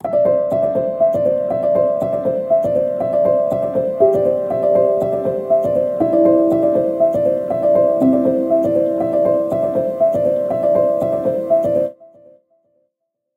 piano background 4
Just some quick piano background for the videos, I originally used it to put on a video of some DIY stuff
Not so very proud of it, but I think that will do if using in a simple projects.
That's why I'm giving it away for free. :) hope that helps!
peaceful, music, movie, sustain, chill, video, muted, piano, silent, natural-reverb, background